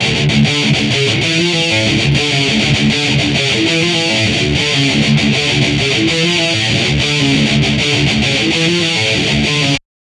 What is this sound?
REV LOOPS METAL GUITAR 3
rythum guitar loops heave groove loops
heavy, rock, guitar, groove, thrash, metal